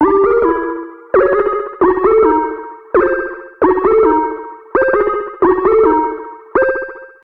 Planet Head
Makes me think if a planet was a human it's head would make that sound.
Dark, Ice, Space